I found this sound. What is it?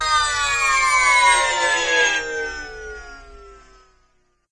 Another take on "magic." This time, someone's magic is being nullified. Of course, you could use it for other things, but that was my intention.